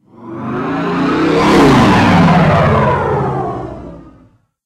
ship flyby 2
Starship flying by. made in fl studio.
sci-fi, spaceship, whoosh